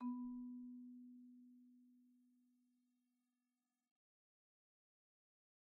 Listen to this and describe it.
Sample Information:
Instrument: Marimba
Technique: Hit (Standard Mallets)
Dynamic: mf
Note: B3 (MIDI Note 59)
RR Nr.: 1
Mic Pos.: Main/Mids
Sampled hit of a marimba in a concert hall, using a stereo pair of Rode NT1-A's used as mid mics.